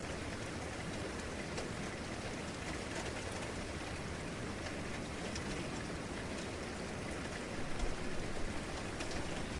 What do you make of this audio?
AMBIENT - Rain - Light - Near Drainpipe (LOOP)
Looping Deep rumble of the City in the backround, while rainwater drips down a drainpipe on one side.
Deep, fresh sounding, just after the rain.
Recorded with Zoom H4 Handy Recorder
deep, drainpipe, droplets, field-recording, nature, outdoors, rain, rainfall, rumble, shower, sprinkle, weather